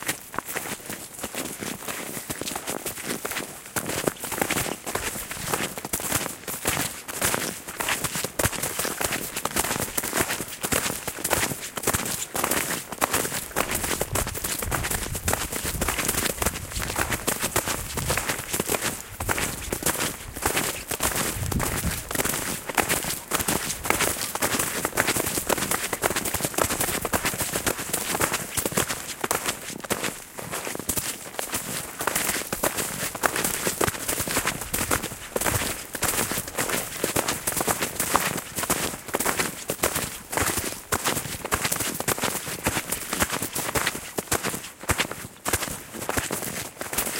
Snowshoeing on hard packed snow.
Two people snowshoeing on hard packed snow, one wearing neoprene snow pants.
File sounds much less compressed when downloaded.
Some intermediate wind sound and distant voices.
Recorded on an Olympus LS7 portable digital recorder.
snowshoes, footsteps, snowshoeing, marching, two-people, hard-pack, soldiers, mountain, field-recording, LS7, couple, hard-packed, walking, winter, snow